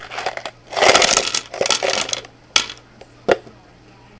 Metal compression fittings poured out of a plastic container onto a wooden table